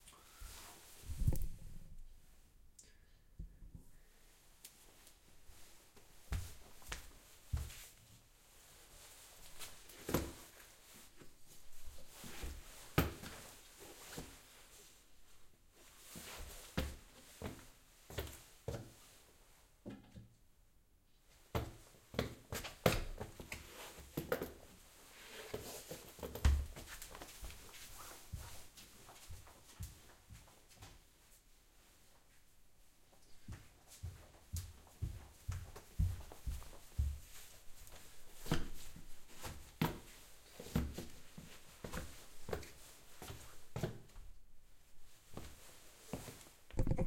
Walking terrace
people, walking